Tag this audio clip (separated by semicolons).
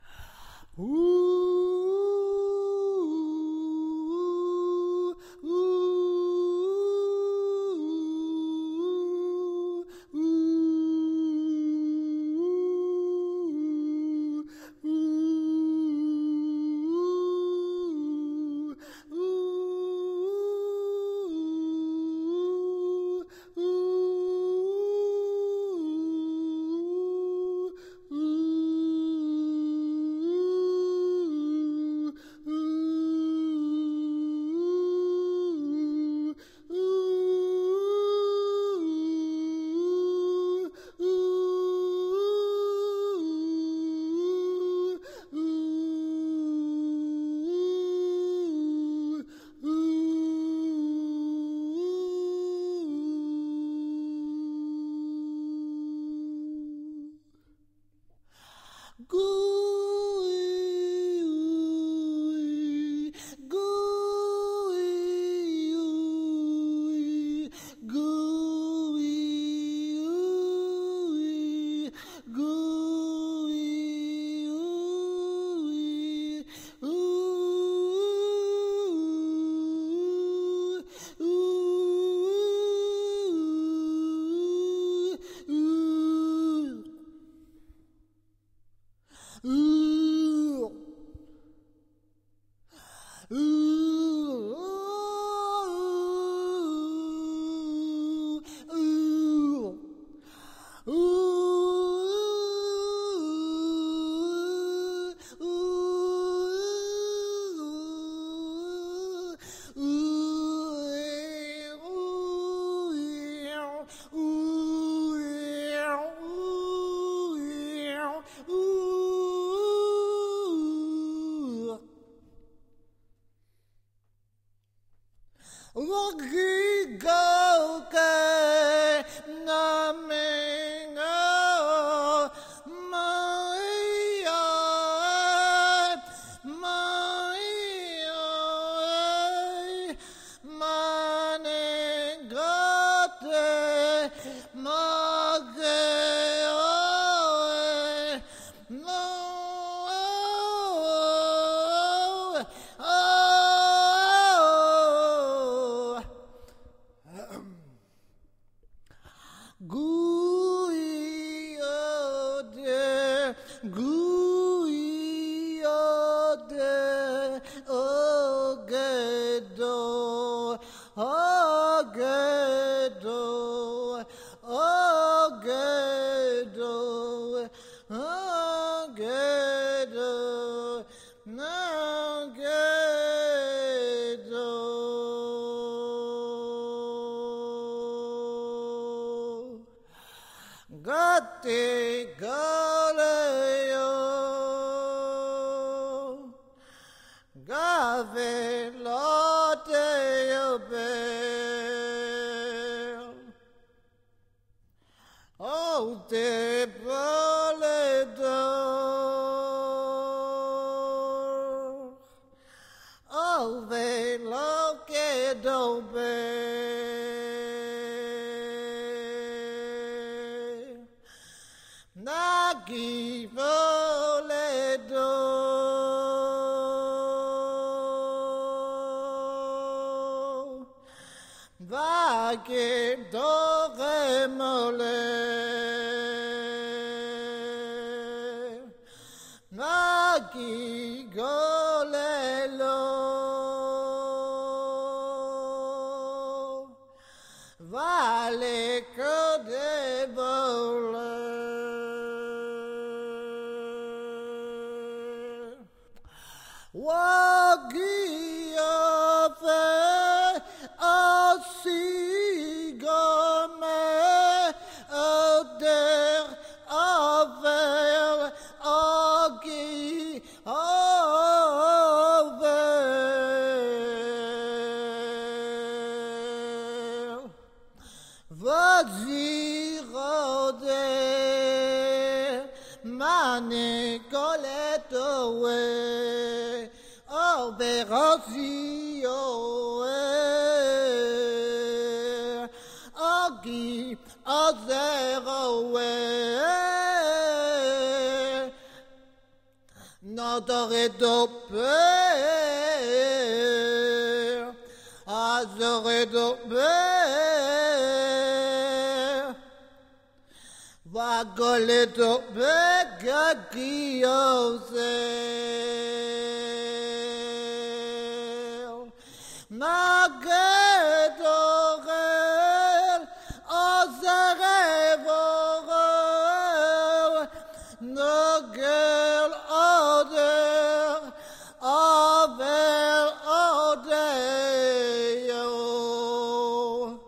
male; poetry; vocal; voice